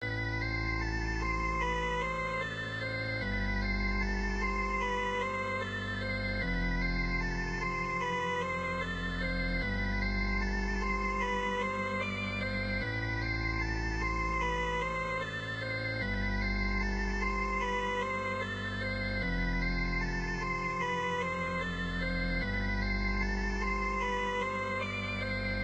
Hypo-Lead-150bpm
Lovely, fluent organ-like synth loop.
Useful as main or background synth-loop.
150bpm, beat, break, breakbeat, dnb, dub, dubstep, floating, high, liquid, long, loop, low, organ, step, synth